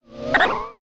Short tape derived noise.